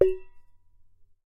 Metal water bottle - hit with knuckle
Hitting a metal water bottle with my index finger knuckle.
Recorded with a RØDE NT3.
Metal, Thermos, Foley, Strike, Hit, Bottle, Impact, Water